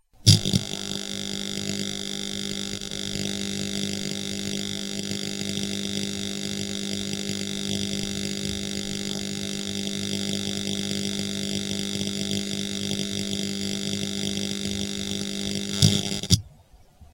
An Arc Welder, zapping.
welder, electric, zap, shock, electricity